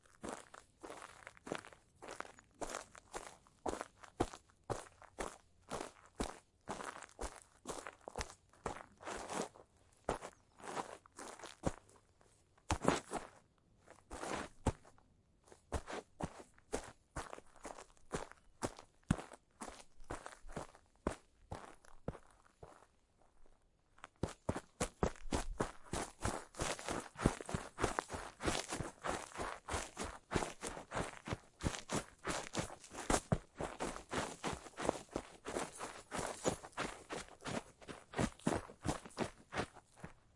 Footsteps - Gritty Soil - Walk Fast then Run
Hiking shoes. Walking then running on gritty soil and some pine needles. Very crunchy stompy sound. A few bird in the background, as this was recorded outdoors.
walking, footstep, dirt, step, run, steps, walk, footsteps